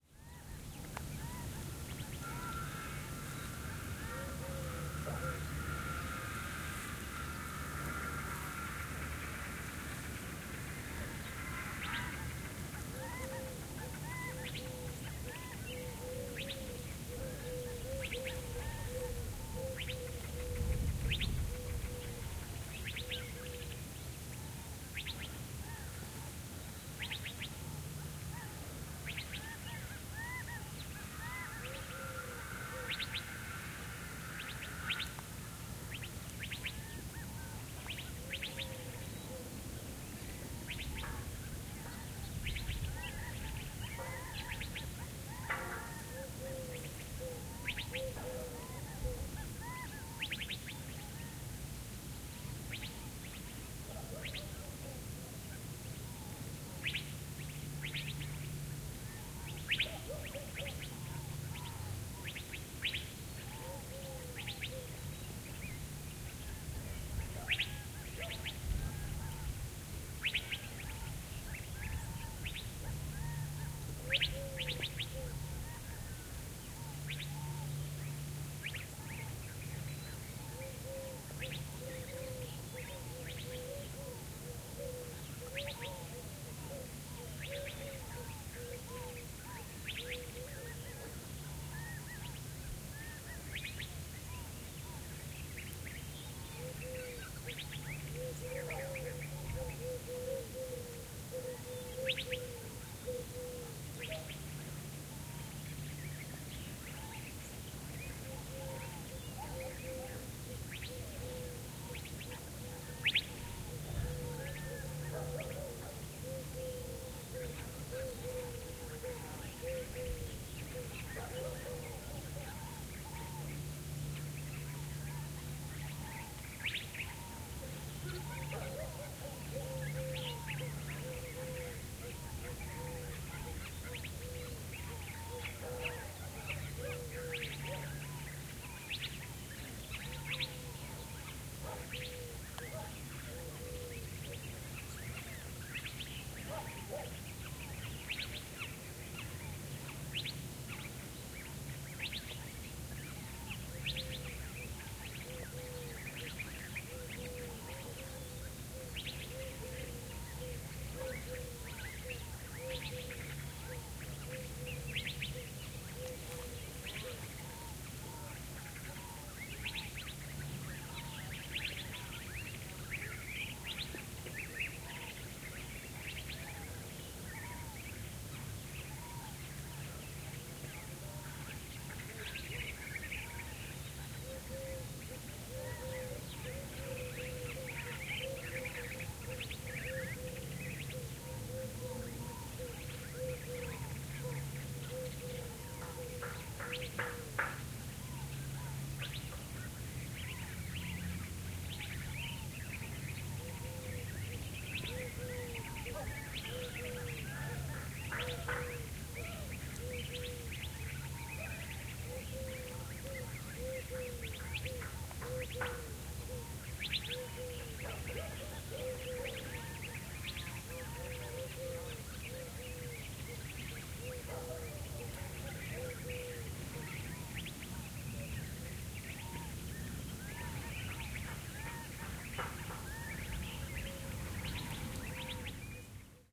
Ambi - village across valley - dessert, birds, dogs, saw - binaural stereo recording DPA4060 NAGRA SD - 2012 01 10 Apache reservation Arizona
This is a binaural audio recording, so for most true to nature audio experience please use headphones.